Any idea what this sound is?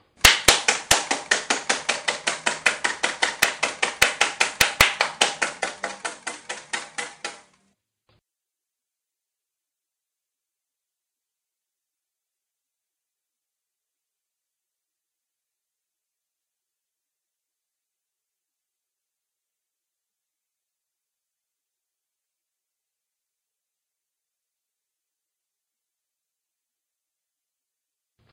I needed a the sound of a flapping piano roll for our production of Music Man. Not having a piano I recorded the sound of two pieces of cardboard slapping togather. Recorded with a Zoom H2n. Modified with Audacity. 110 seconds of silence at end.